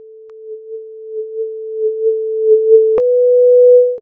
PALANDJIAN Camille 2014 2015 resonance1
THE DIFFERENT CREATION STEPS
- Creation of a sinusoidal sound of 3s with a frequency of 440Hz (La)
- Creation of an other sinusoidal sound of 1s at the end of the first one, with a frequency of 493,9Hz (Si)
- Fade-out effect to gradually decrease the sound volume between 3,80 and 4,00s
- Modification of the envelope at the end of the sound
- Wahwah effect with the following parameters :
LFO Frequency - 1,5Hz
Start-up phase - 0 deg
Depth - 30%
Resonance - 4.0
Wha frequency lag - 30%
- Modification of the sound's envelope to obtain a crescendo effect
resonance, science-fiction, sonar, suspens, wahwah